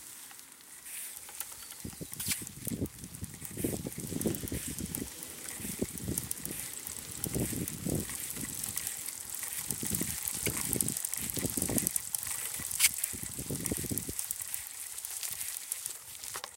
Bike On Grass OS
Mountain-Bike Pedalling Grass
Grass, Mountain-Bike, Pedalling